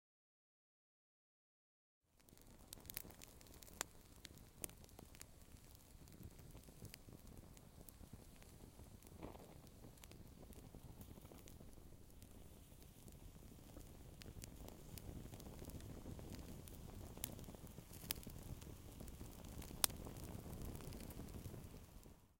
fire in the wind
Sound of hot fire in fireplace in nature during windy weather.
cracking, CZ, Czech, fire, fireplace, Panska, wind, wood